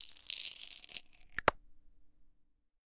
PepperGrinder Closing
Very present and close pepper grinder lid closing.